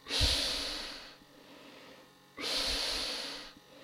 This is a recording of labored breathing though a French made gasmask. enjoy.
labored, gasmask, hiss, breathing